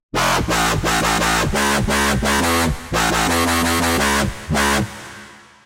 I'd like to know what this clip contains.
DnB&Dubstep 002
DnB & Dubstep Samples
bass, dnb, drumandbass, drumstep, dubstep